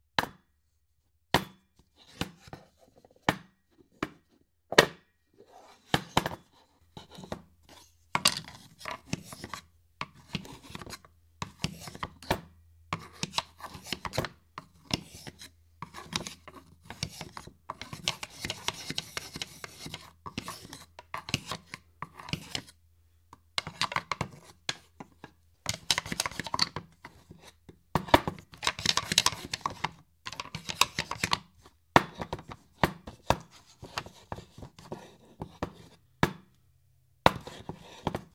Indoor Wooden Utensils Noises Scrape Various
Wooden kitchen utensils being scraped together.
sounddesign, wooden